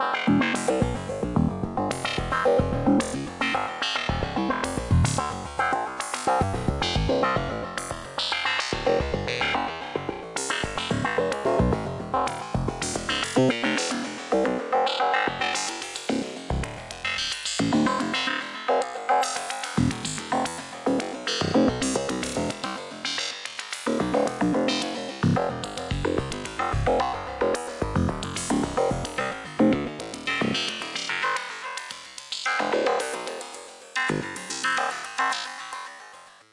Synth SFX Loop
110 BPM
Key of Cm
June 2020